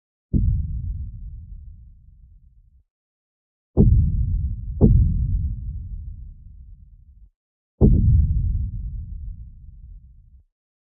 Synthesized sound of distant explosions.